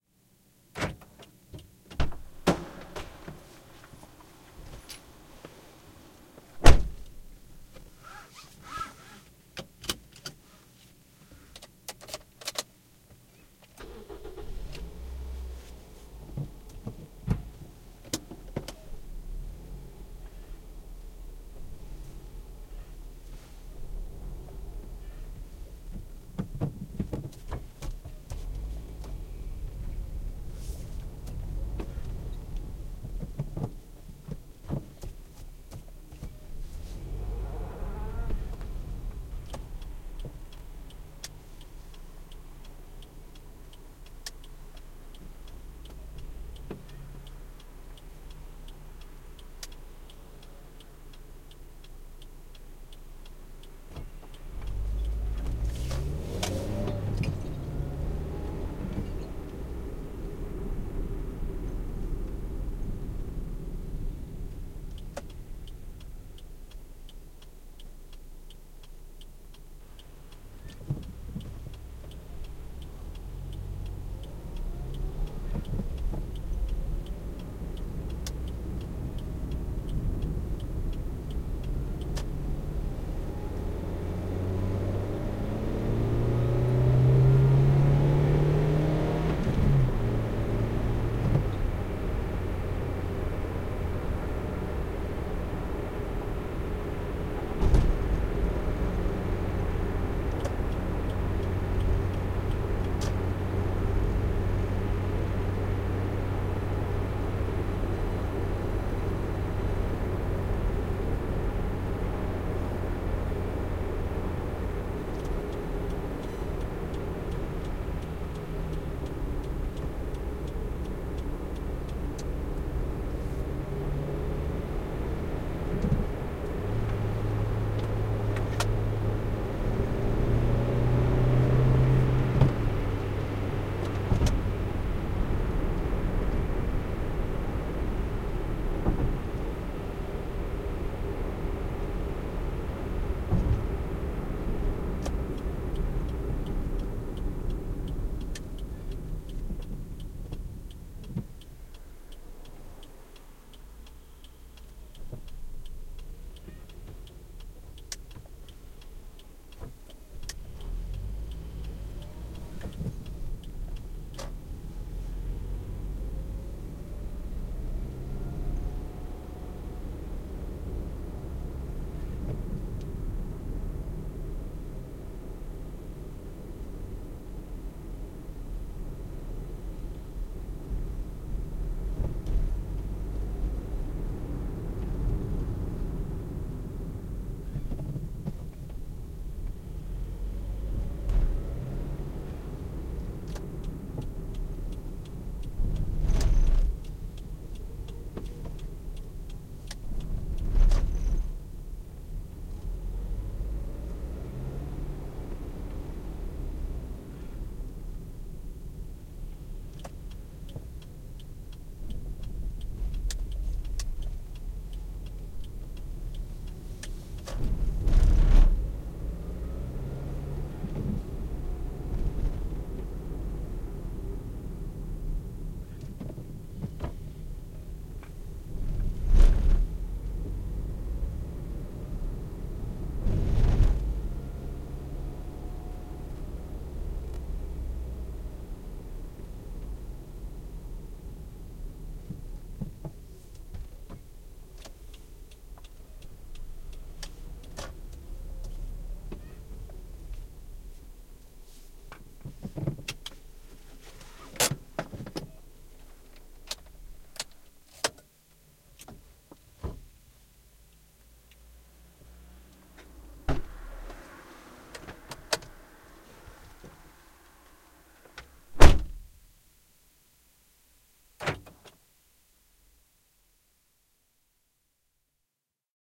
driving a car in the city (stereo)
The full situation of driving a car from opening the car and starting the motor to driving from estate to city highway to parking the car.